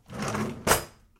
Opening a drawer full of knives and other kitchenwares.